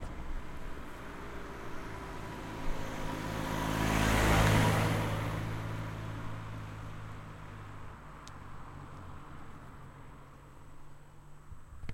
Car Fiat Punto pass by
a single Fiat Punto 1200 cc passing by